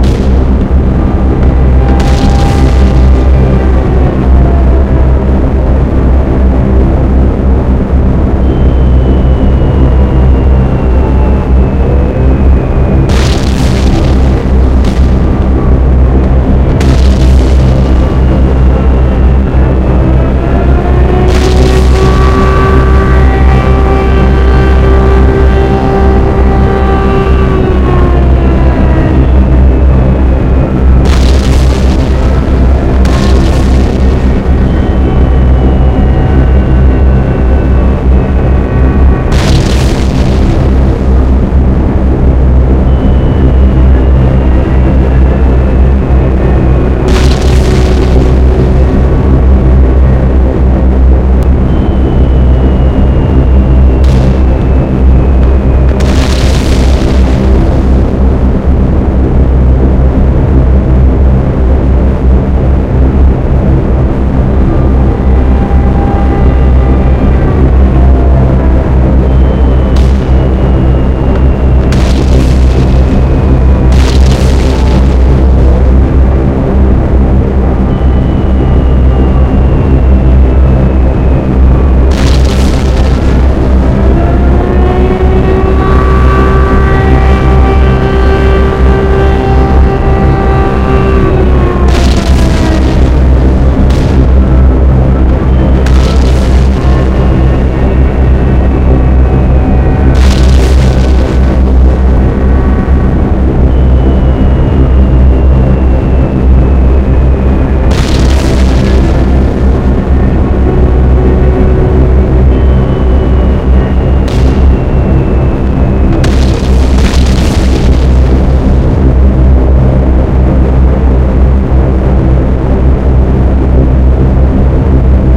Air Raid
Air-Raid, World-War-2, Explosions, Bombing, Field-Recording, Crash, Planes, Raid